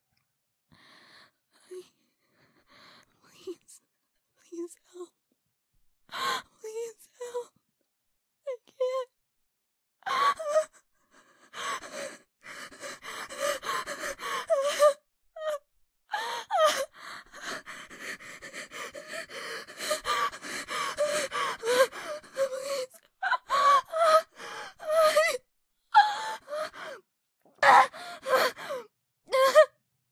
horror: whispers and heavy breathing
request, vocal, english, whispering, scared, heaving, women, horror, voice, female, breathing, girl, fear, game, worried, movie